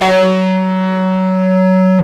Recorded direct with a Peavey Dynabass in passive mode, active mode EQ is nice but noisy as hell so I never use it. Ran the bass through my Zoom bass processor and played all notes on E string up to 16th fret then went the rest of the way up the strings and onto highest fret on G string.
bass, electric, guitar, multisample